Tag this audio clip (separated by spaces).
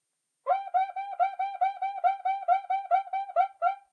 samba pattern brazil percussion rhythm drum groove